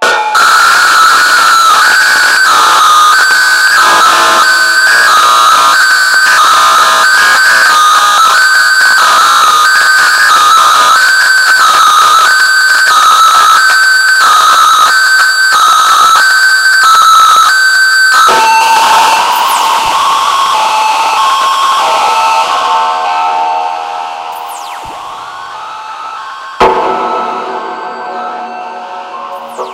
hi-passed bass, with lot of distorsionit "scream like a bastard"if i well remember was modulated by a square lfothis long version have a strange filtered tail- WARNING! this sound is very loud! -I needed aggressive sounds, so I have experienced various types of distortion on sounds like basses, fx and drones.Just distorsions and screaming feedbacks, filter and reverbs in some cases.
terror, soundtrack, heavy, noir, electro, distorsion, punch, horror, bass, warning, feedback, scream, annoying, hard, experiment, bad, dark, film, score, scary, illbient